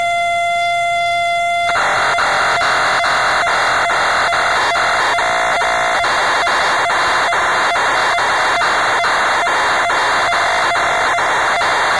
ti99 cassette program
This is the sound of data from a program used with a TI99 home computer. These noisy sounds were stored on a cassette, and you could load them into a BASIC command line and run them.
ti99, noise, 4a, tone, data-storage, cassette, program, vintage, ring-tone, computer, static, basic